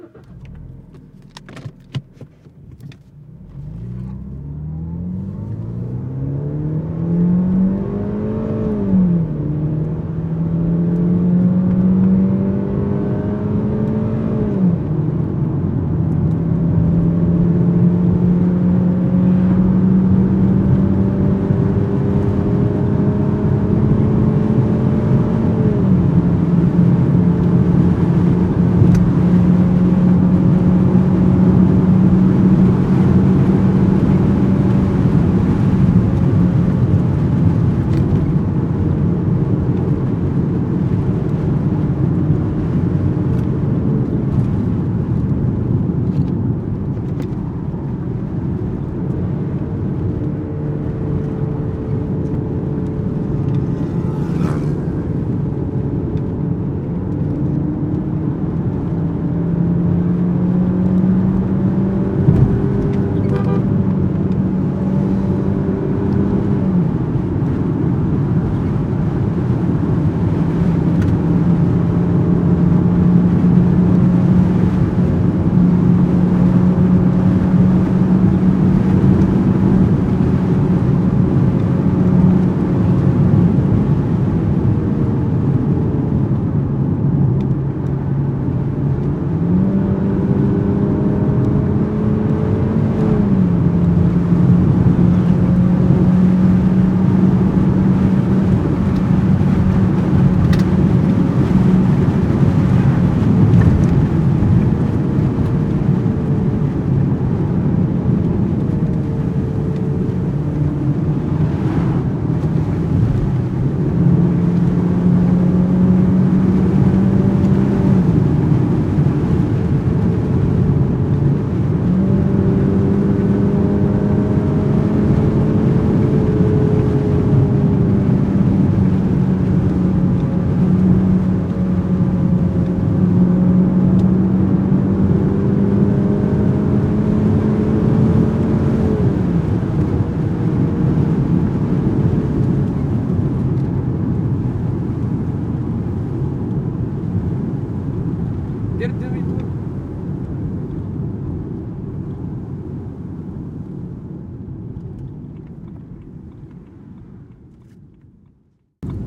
Int-Convertible-car2
field recording of a convertible BMW. During the ride the rooftop was down. The mic (sanken cs3e) was at the front pointed towards the engine.
convertible, bmw